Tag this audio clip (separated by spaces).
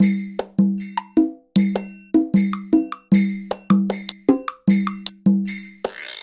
drum chillout loop lounge tribal etnic 77bpm brush downtempo